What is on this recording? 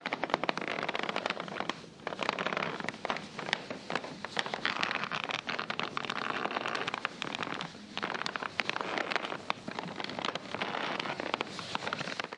01-1 walk crunching the floor, slow
Crunching the floor, slowly
cruch, floor, foot, slow, step